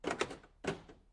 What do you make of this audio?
Van electronic lock
A van's electronic lock. Recorded with a Zoom H5 and a XYH-5 stereo mic.